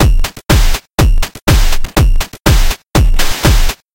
omg-drums-2
Another drum loop! Yay!
thanks for listening to this sound, number 217557